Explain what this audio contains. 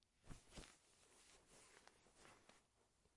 Tying handkerchief 2
Tying a handkerchief.
rope, knot, tying, handkerchief, tie